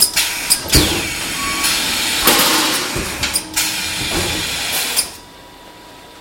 die, industrial, machine, factory, field-recording, metal, processing
die, factory, field-recording, industrial, machine, metal, processing